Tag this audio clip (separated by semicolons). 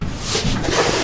Foley; sample; scrape